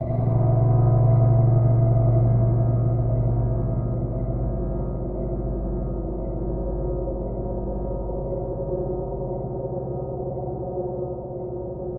a haunting sound with reverb